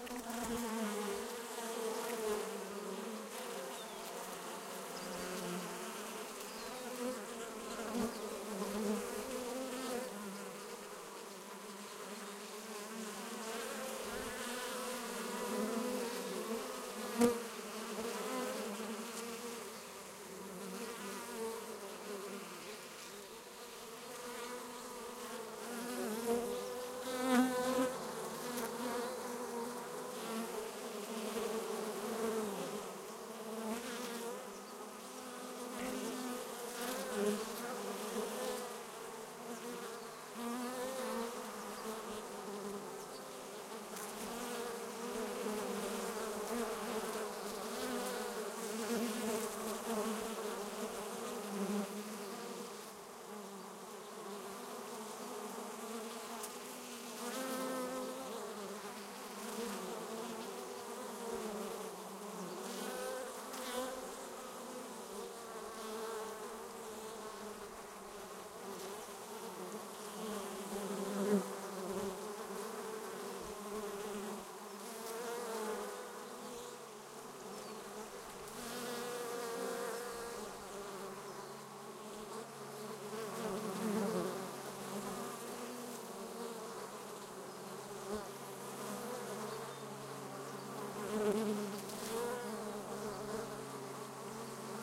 Bees getting a drink
European honey bees (Apis mellifera) getting a drink at the edge of Putah Creek outside of Winters California. Several species of birds can be heard in the background... Recorded July 18, 2013 5 seconds of file.